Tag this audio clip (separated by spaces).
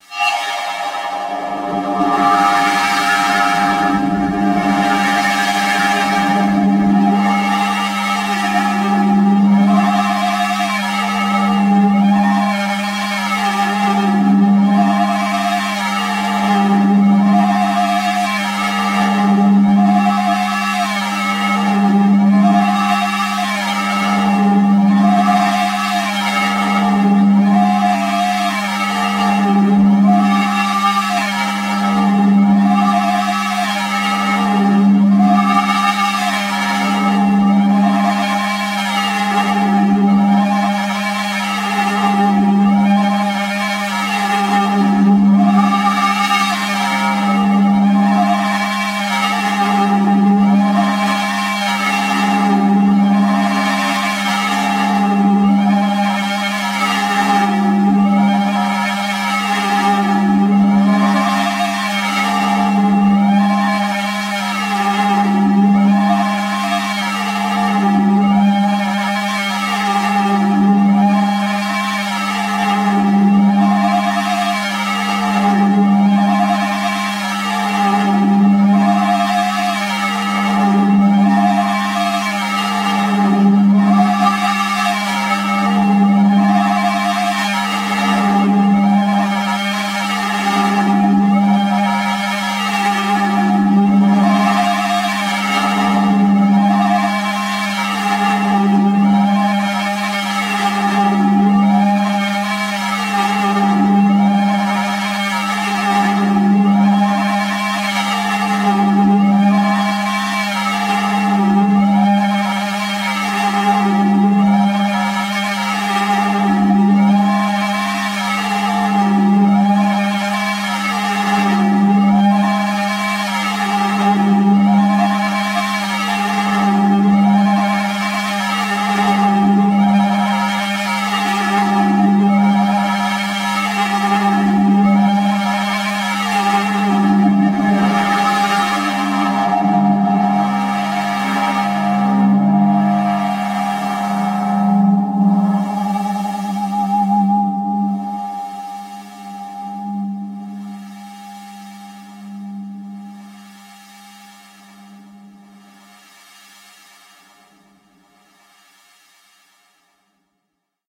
mechanism athmosphere wind disturbing sci-fi wierd noise horse feedback sfx sunvox drone strange glitch experimental distorted electronic sound-design abstract procesed soundeffect distortion lo-fi digital fx